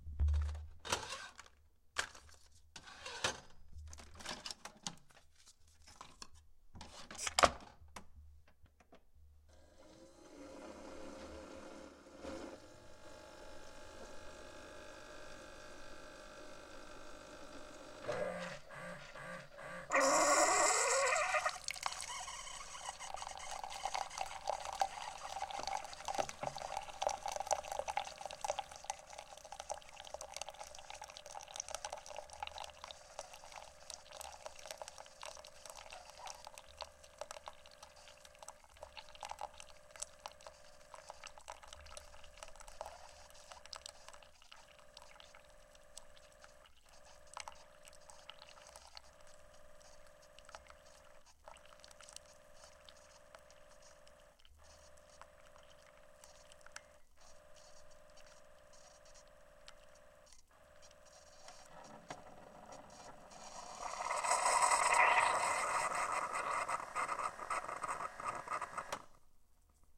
This is an individual cup-based coffee maker doing what it does best.